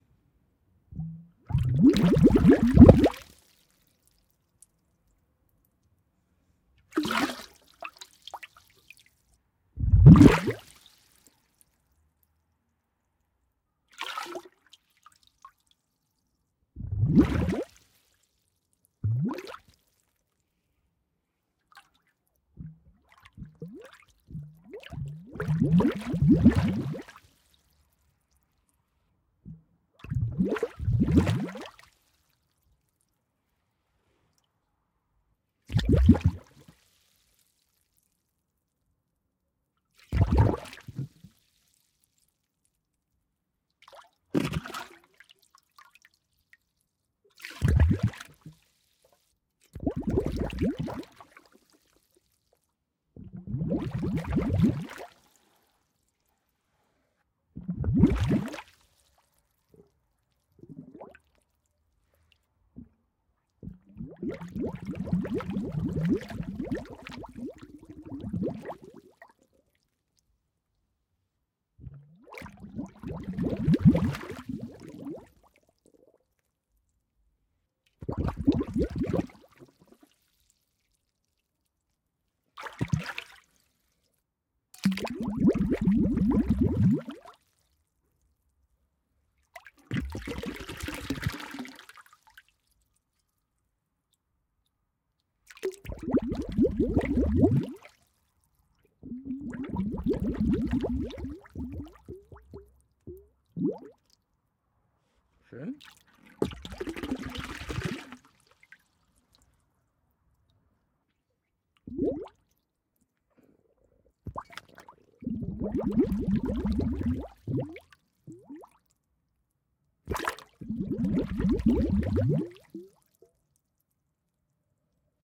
Air bubbles underwater, recorded from surface, gurgle, released from a hollow pot
Some small device with hollow space, put underwater and releasing the air. Bubbles recorded from above the water. Close. Gurgle.